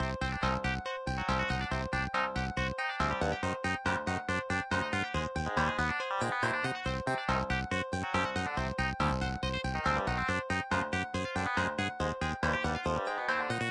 whats that smelly feindly noize